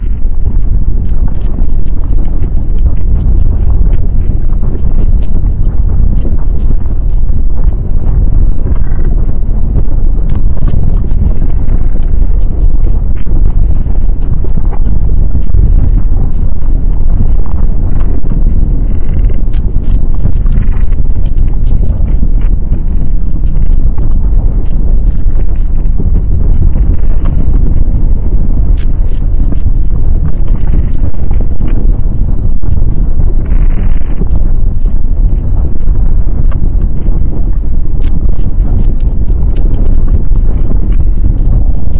earth rumble
I've actually been frustrated with the lack of earthquake-type sounds, sooo I attempted my own. not very good. it consisted of different items making different sounds, then slowed down, pitch change, some reverse, some echo, blah blah blah. it's a mess, but that's what rumbling is supposed to be, right? also, depending on your speakers, this may be very loud.